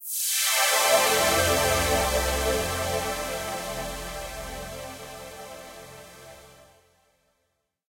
Swoosh FX Medium
Synth swooshing sound of a square wave. Suitable for intros or logos. Available in several intensities.